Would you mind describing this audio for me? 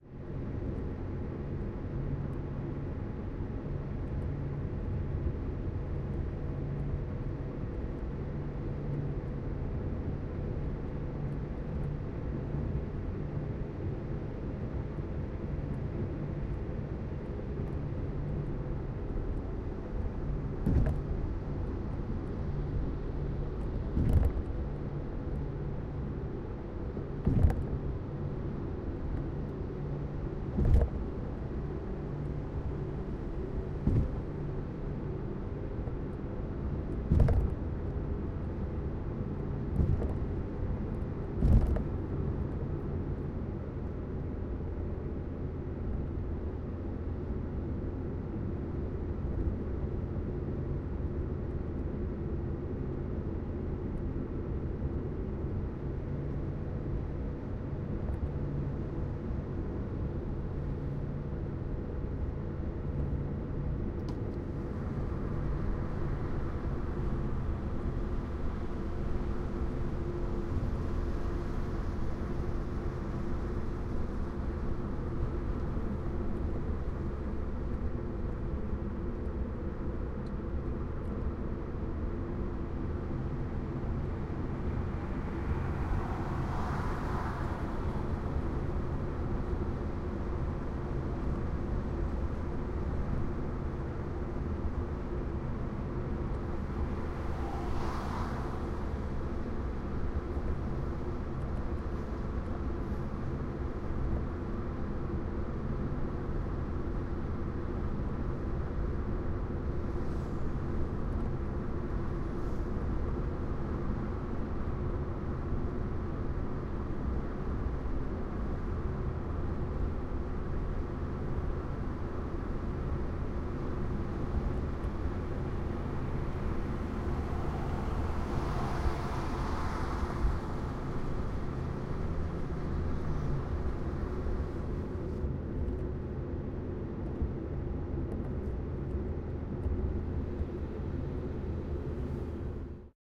HIGHWAY CAR INT FRONT ST 01
Honda Civic 2001 at 100km/hr on transcanada highway going across bridge - thumps at beginning are seams of concrete segments of bridge - and onto island. Few passbys, windows shut recording of interior with SamsonH2 surround mode - two stereo pairs - front and back
car, highway, canda, interior